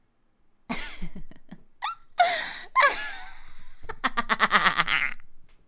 Insane girl laughter
girl laughs. is very funny.
giggle, giggling, voice